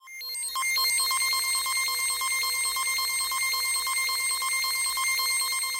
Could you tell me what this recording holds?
It can sound like a background filled with futuristic computers processing data.
Created using Chiptone by clicking the randomize button.